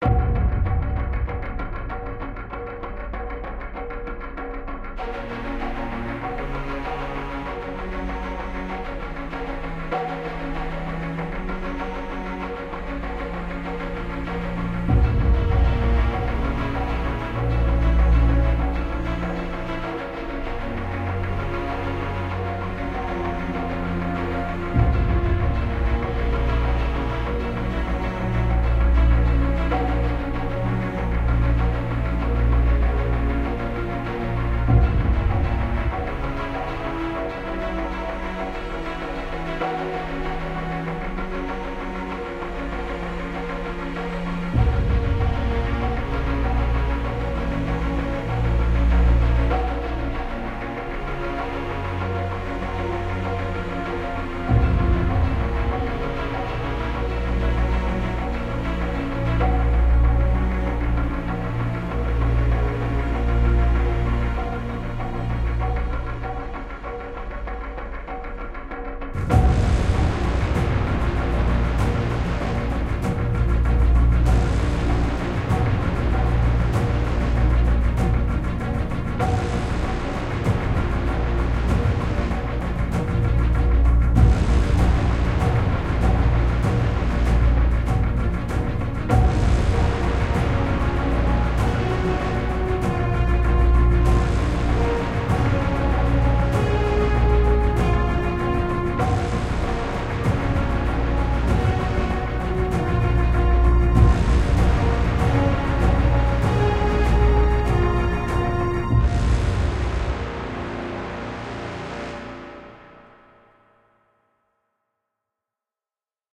This is music for if you have a super hero who is about to change into his super hero form! or is getting ready to suit up! or a montage of him training and finally he is ready!
Maurice "YoungBlaze" Clopton